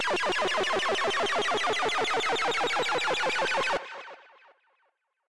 Synth chiptune 8 bit pitch up rise build up 2
pitch, 8, Synth, chiptune, bit, rise, up, build